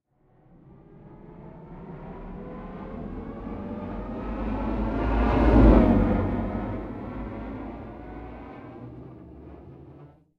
Abstract Spaceship, Flyby, Ascending, A
Audio from my new sound effects library - "Abstract Spaceships" - with various spaceship sounds created without using jet plane recordings. Several metal tins were scraped with a violin bow, combined together, and pitch shifted to create this ascending spacecraft sound.
An example of how you might credit is by putting this in the description/credits:
The sound was recorded using a "H6 (XY) Zoom recorder" on 27th January 2018.
spaceships, futuristic, spaceship, sci-fi, space, flyby, ascending, abstract, Scifi